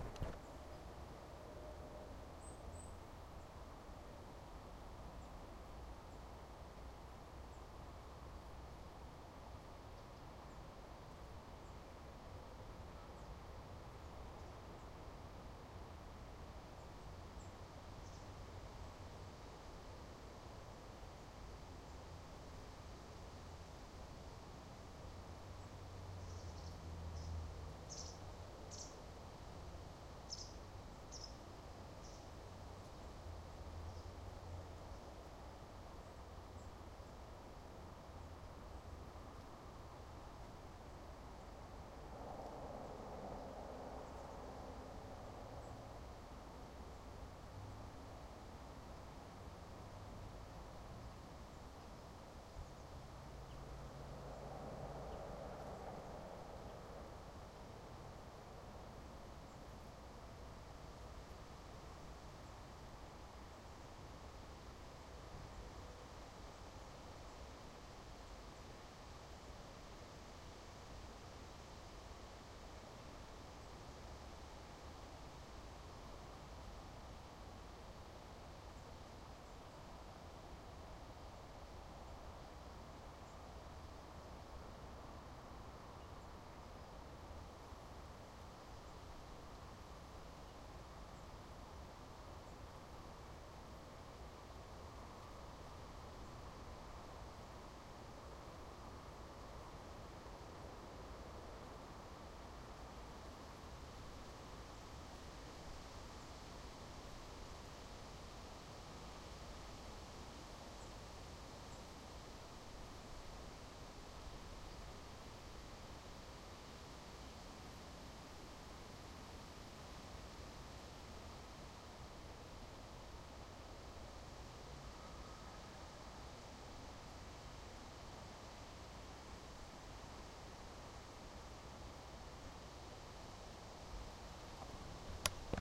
A simple field recording of an autumn day in Tikkurila, Vantaa, Finland.